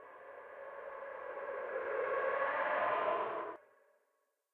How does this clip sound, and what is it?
Reverse Dog
Reversed and paulstretched dog bark
bark, horror, dog, paulstretch, scary, reverse, barking